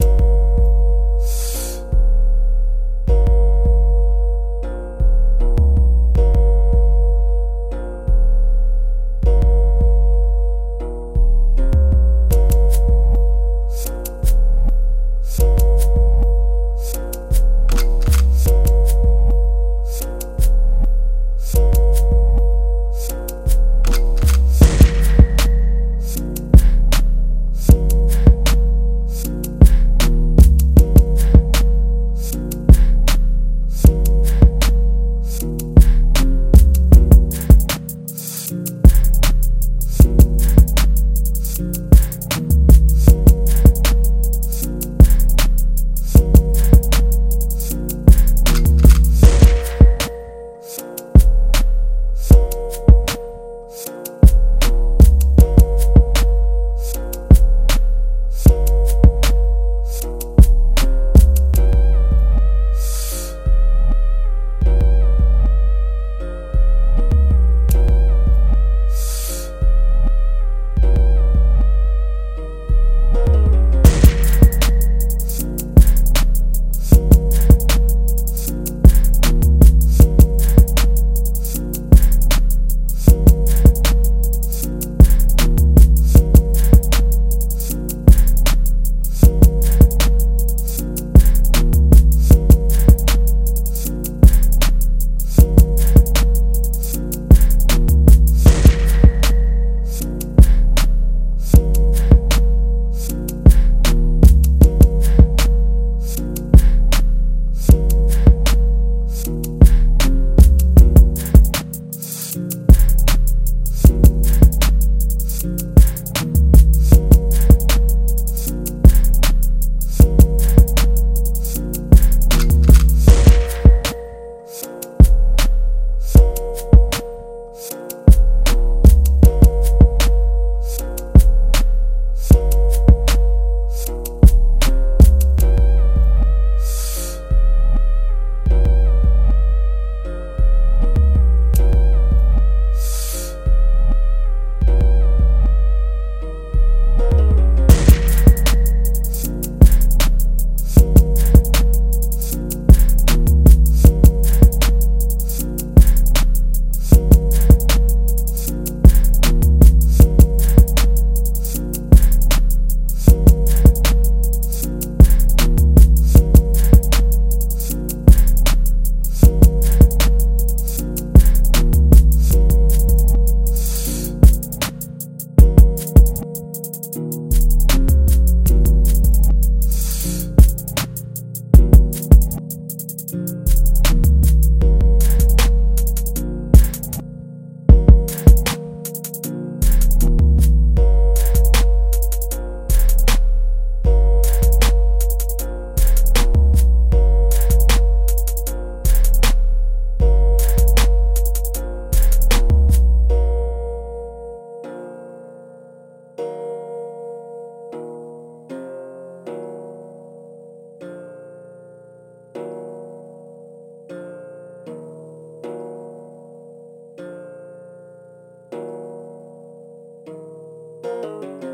Dangerous World
This Is a trap Beat i made using Mahammed ( New Sad Guitar Melody) Sound>
Thanks For allowing me to Make This!